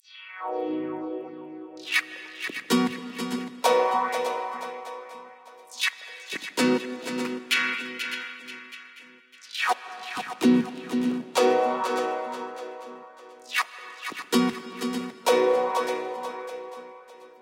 Guitarr fredd
guitar manipulada live 9
ambience, ambient, chill, deep, house